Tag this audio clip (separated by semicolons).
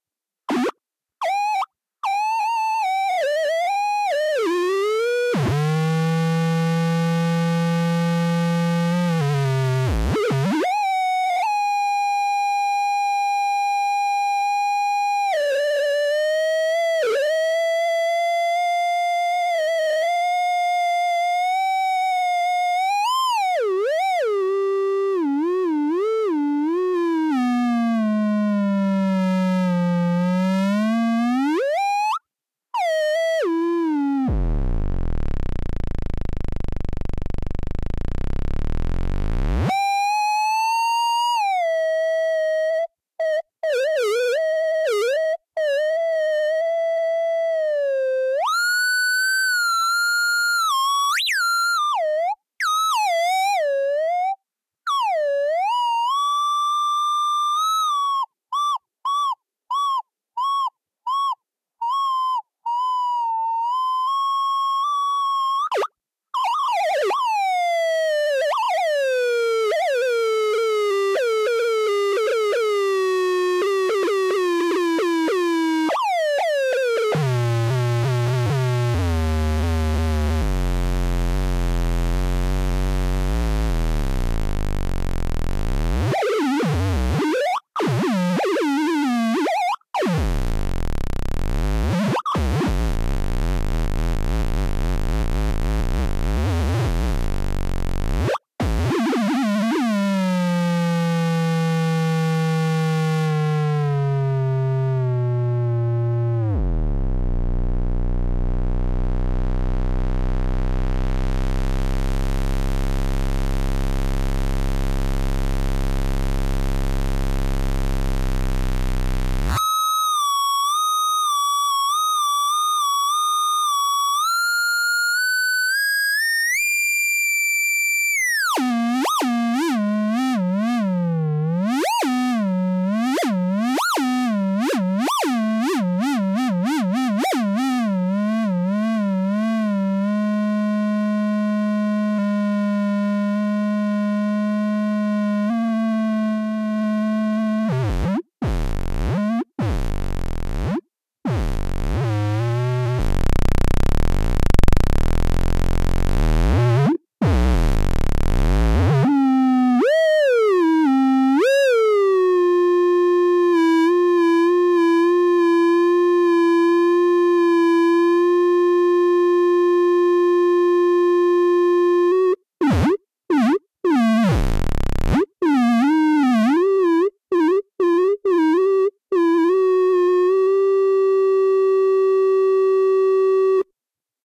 analog; feedback-loop; wave